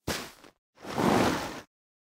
grab and pick up move block of snow from igloo